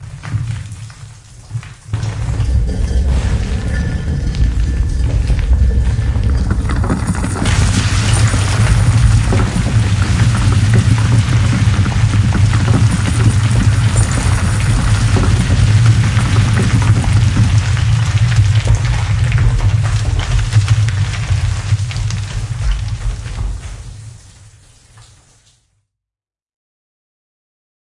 Indy Rocks Falling Cue 4 Ve
The sound of a temple door crushing to the the ground as our adventurer escapes. Water starts to flood. The end is close. Using Logic Pro 8.
While entering a... added by Benboncan
film, radio, rocks, sound-effect, tv